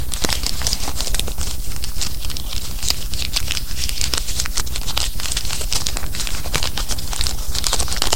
crumpling crisp(not new) dollar bill near mike.